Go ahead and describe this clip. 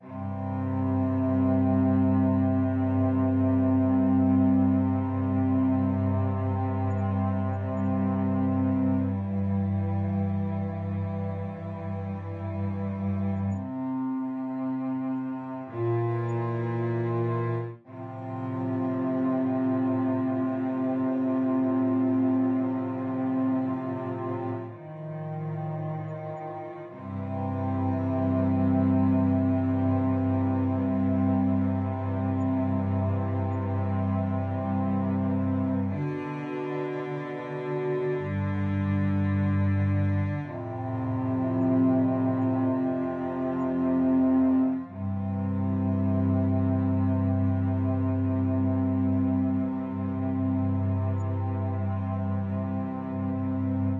Song7 STRINGS Do 3:4 80bpms
80, beat, blues, bpm, Chord, Do, HearHear, loop, rythm, Strings